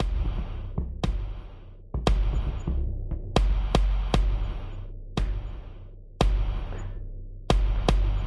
3rdBD L∞p-116BPM-MrJkicKZ
Third Bass Drum L∞p 116BPM - Champagne Rosé Bon Bonbon
You incorporate this sample into your project ... Awesome!
If you use the loop you can change it too, or not, but mostly I'm curious and would like to hear how you used this loop.
So send me the link and I'll share it again!
Artistically. #MrJimX 🃏
- Like Being whipping up a crispy sound sample pack, coated with the delicious hot sauce and emotional rhythmic Paris inspiration!
Let me serve you this appetizer!
Here you have a taste of it!
- "1 Drum Kick L∞p-104BPM- MrJimX Series"
- "Second Bass Drum L∞p 100BPM - URBAN FOREST"
- "Third Bass Drum L∞p 100BPM - $CI FI LOVE"
- "Fourth Bass Drum L∞p 100BPM - HALF ROBOT"
works-in-most-major-daws, Loop, MrJkicKZ, Kick, Bassdrum, Champagne-Rose-Bon-Bonbon, MrJimX, MrJworks, Groove, Rhythm, 116BPM, 4-4, Beat